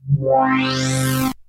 A thick, rich, chorused rising filter sweep with fast amplitude modulation from an original analog Korg Polysix synth.